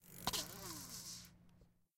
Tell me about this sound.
Bike Tire Short Stop
Stopping a spinning bike tire with an introduced inanimate object (not the hand). Recorded on Stanford Campus, Saturday 9/5/09.